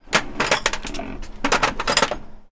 Tools Clank 03
wrench, field-recording, clank, tools